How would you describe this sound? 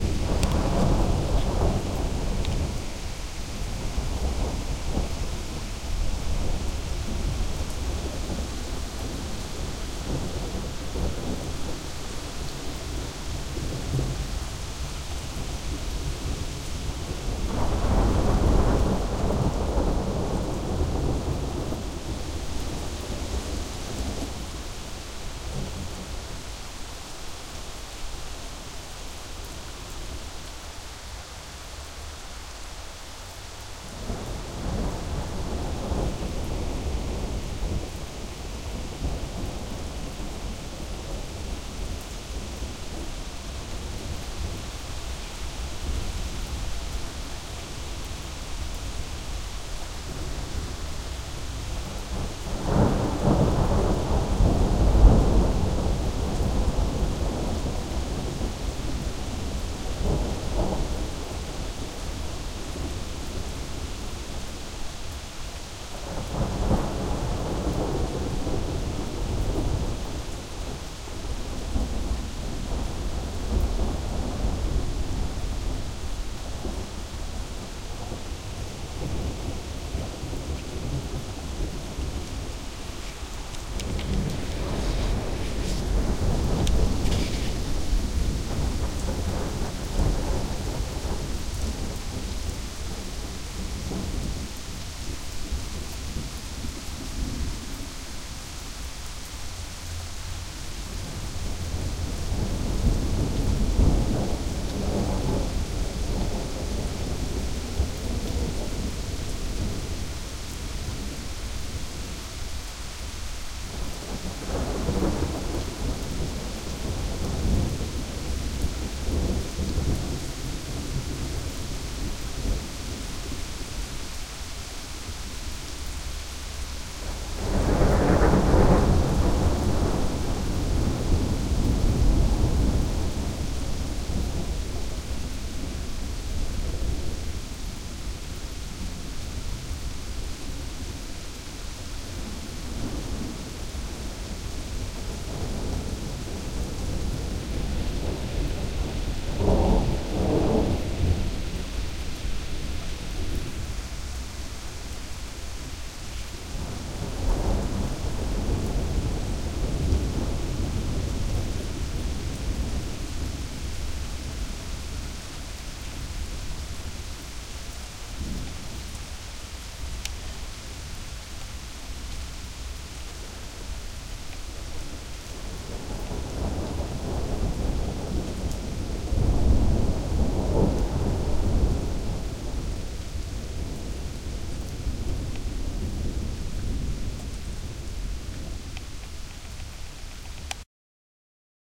End Of Summer Rain On A The Hague Balcony
A end of summer rain/thunder recording on a balcony in the city of The Hague, The Netherlands.
thunder, city, balcony, rain, the-hague, den-haag